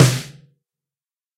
A low pitched, lo-fi, very fat sounding drum kit perfect for
funk, hip-hop or experimental compositions.